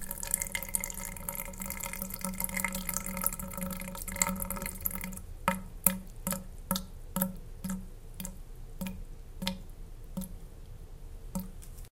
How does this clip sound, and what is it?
baby birth showerdrain
Sounds from the hospital during the birth of a baby, shower drain recorded with DS-40.